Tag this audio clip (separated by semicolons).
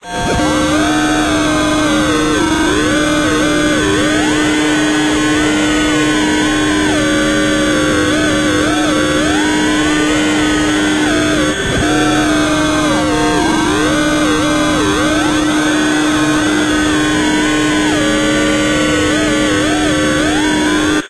interference; radio; idle; FX; static